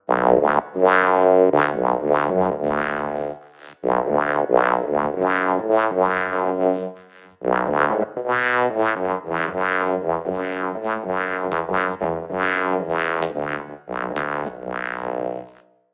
derived from a song about Francois, he is someones cat :)
funny, vocoder